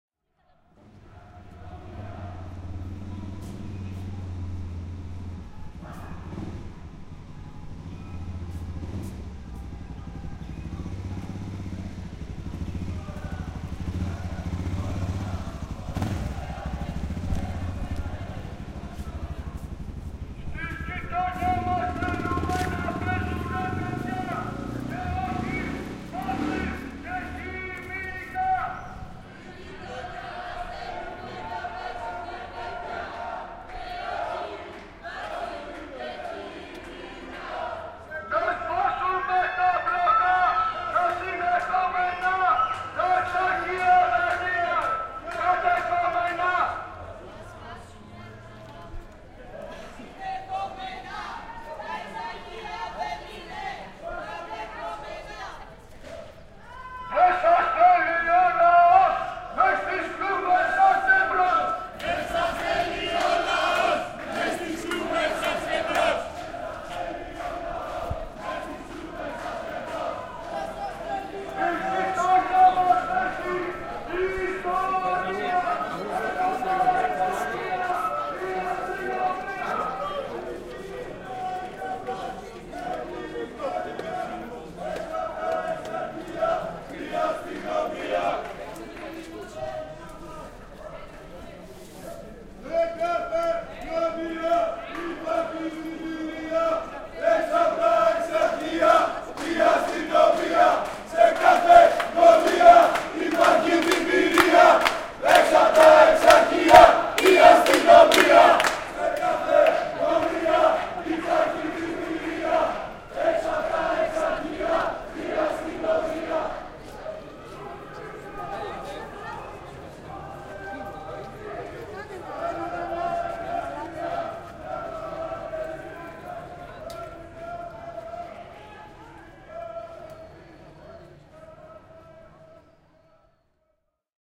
Athens demo 2008
This is a demonstration I recorded in Athens, Greece in 2008.
People passing by and shouting against the police.
Made with and H4 recorder.
athens, 2008